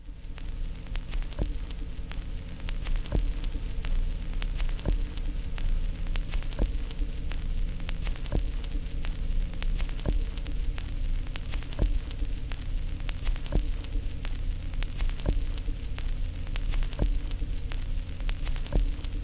these are endgrooves from vinyl lp's, suitable for processing as rhythm loops. this one is mono, 16 bit pcm
noise, raw, end-groove, rhythmic